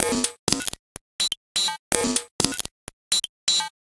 Elctroid 125bpm04 LoopCache AbstractPercussion
Abstract Percussion Loops made from field recorded found sounds
Abstract, Loops, Percussion